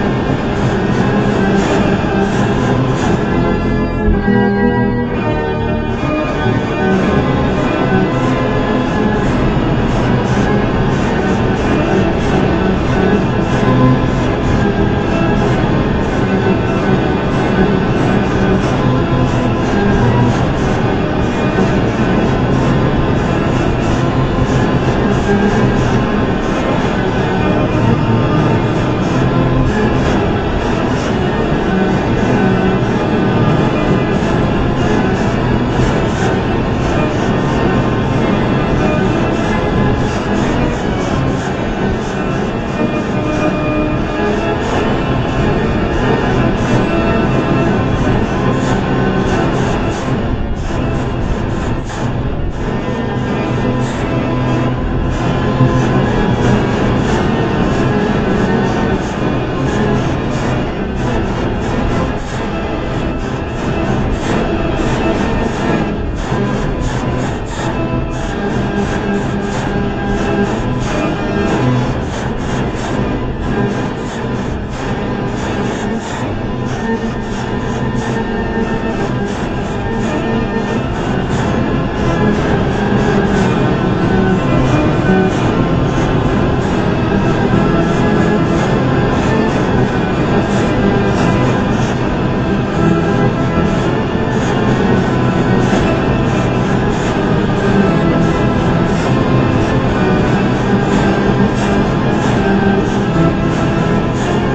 Horror theme
I was messing around with audacity and paul-stretch. And geezzzz. This is like Satan's choir and instruments-from-the-underworld had a baby.